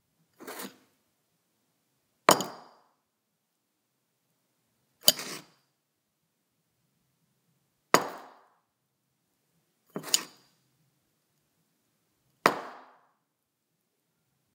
Cereal bowl, pick up, put down on countertop table
Picking up and putting down a cereal bowl on a wooden table
counter, countertop, down, cereal